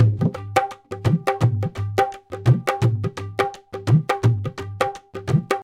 dholak indian sound
85bpm, loop, dholak